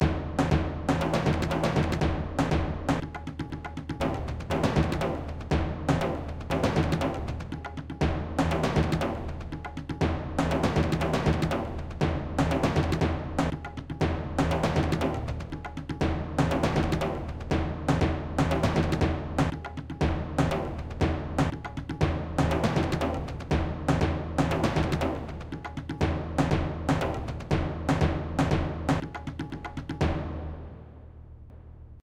i used different percussions and added glitch effects.
turkey, 120, drum, bpm, tempo, anatolia, percussion, turk, loop, turkish, glitch, ethnic